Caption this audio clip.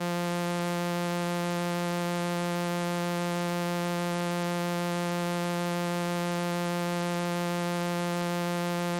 Transistor Organ Violin - F3
Sample of an old combo organ set to its "Violin" setting.
Recorded with a DI-Box and a RME Babyface using Cubase.
Have fun!
70s, analog, analogue, combo-organ, electric-organ, electronic-organ, raw, sample, string-emulation, strings, transistor-organ, vibrato, vintage